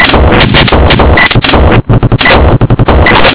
Loop-Glitch#07
bent, break, fast, glitch, glitchcore, loop